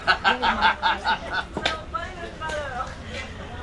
cackle laugh
Laughter recorded in a French market. Part of a field-recording pack. Made with minidisc.
ambience, field-recording, laugh, laughter, voice